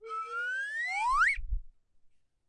Slide Whistle up 04
Slide Whistle - effect used a lot in classic animation. Pitch goes up. Recorded with Zoom H4
silly, soundeffect, whistle